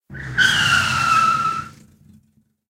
Car Braking Skid stereo sound.